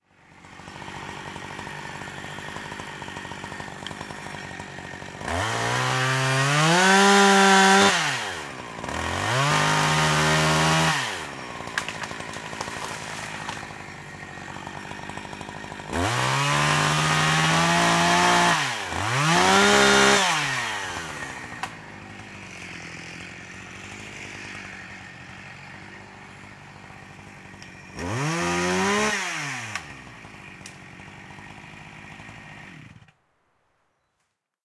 A stereo field-recording of one man snedding a windblown Oak tree (Quercus petrea )which had fallen over a footpath in a woodland. Rode NT-4 > FEL battery pre-amp > Zoom H2 line-in.
chainsaw, snap, windblow, limbs, field-recording, xy